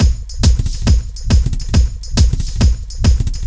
drum loop 138 bpm
loop, 02